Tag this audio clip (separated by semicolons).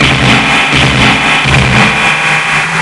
amp; distortion